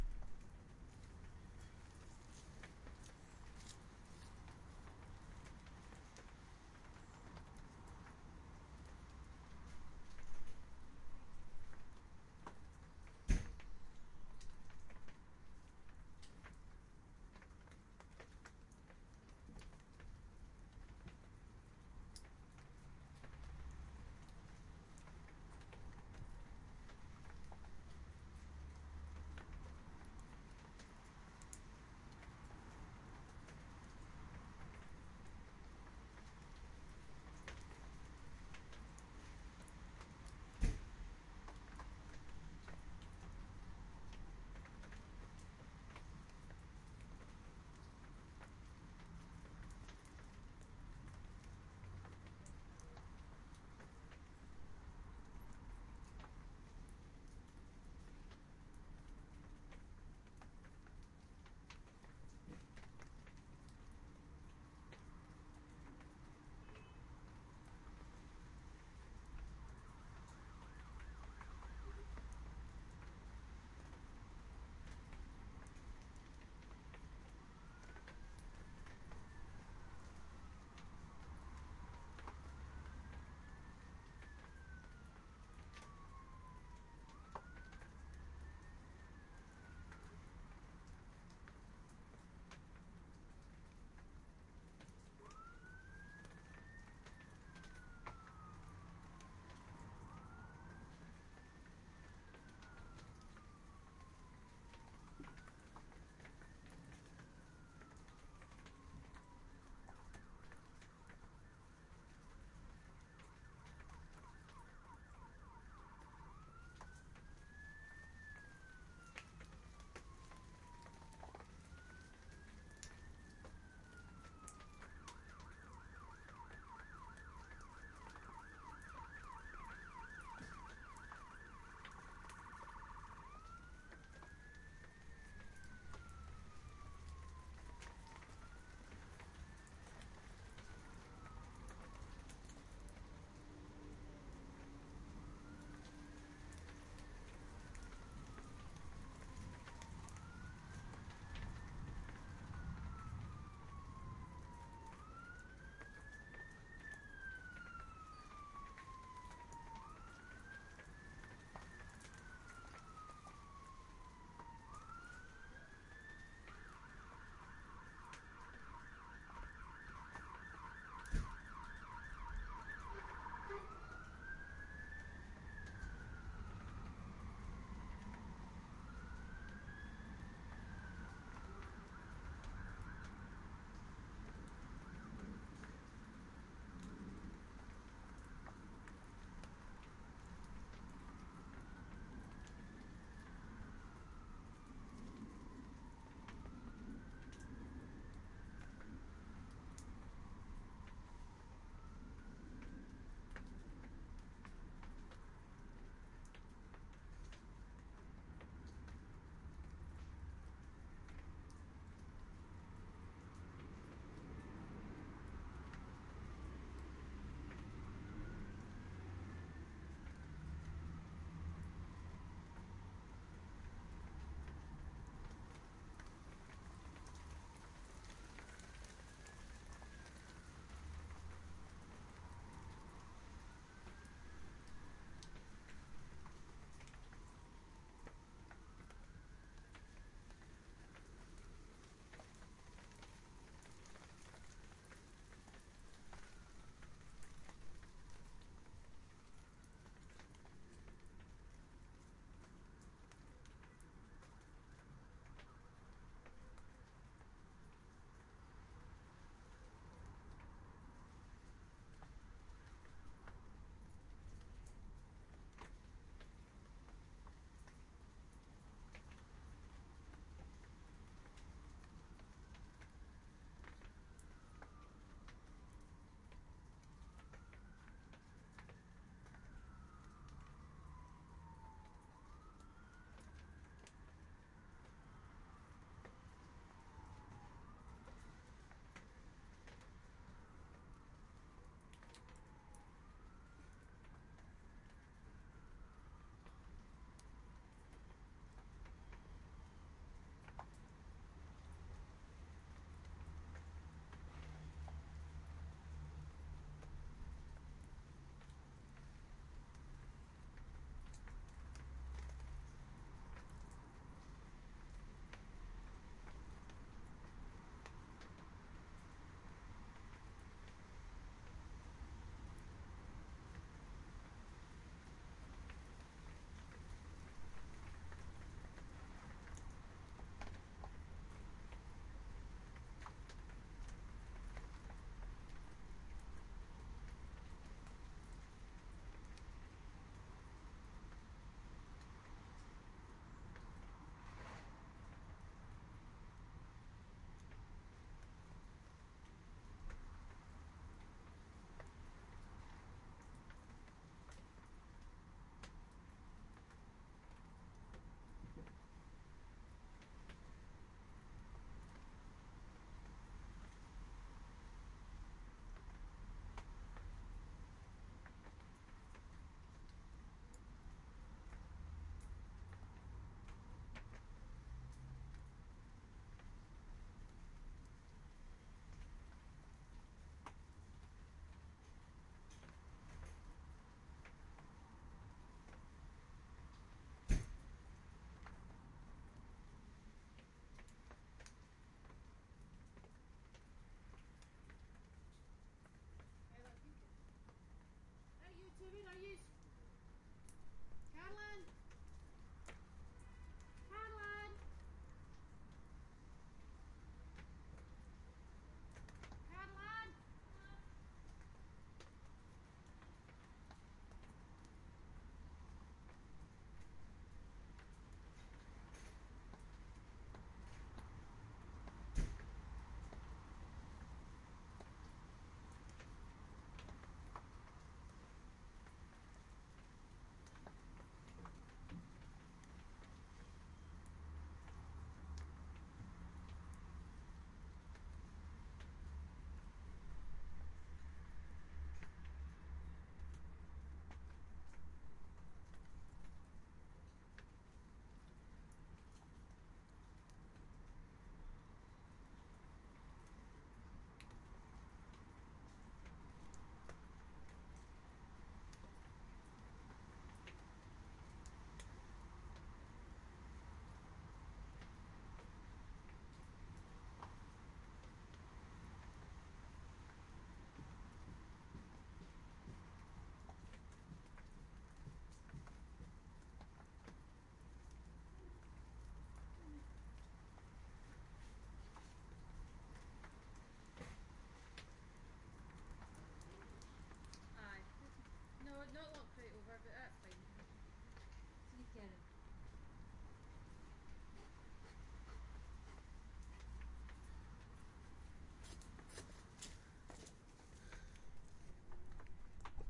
Rain after Thunder from Shed 2013-07-25 4
Rain after a thunderstorm in Glasgow, Scotland. Microphone positioned inside a shed with the door open
Recorded on an iPhone 4S with a Tascam iM2 Mic using Audioshare App.